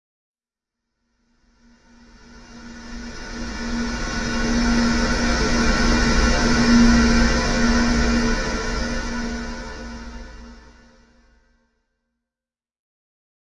Shot Bearing
Pad sound similar to that of a motor running with a worn out ball bearing.
ambient, dark, dirge, edison, fl, flstudio, pad, soundscape